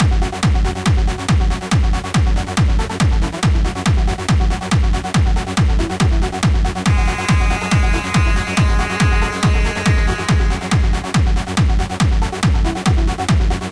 Trance Train
loop, 140bpm, dance, techno, trance